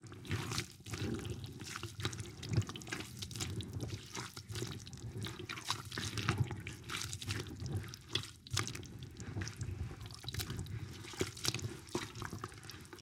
Stirring Mud in Bucket by Hand - Foley
Moving my hand around in the mud
bucket, Five, gallon, mud